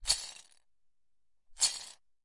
lego - shakes 07
Shaking a bowl full of Lego pieces.
shaker, percussion, plastic, shook, toys, rustle, rattle, bricks, toy, legos, parts, shaken, lego, lego-bricks, percussive, shake, pieces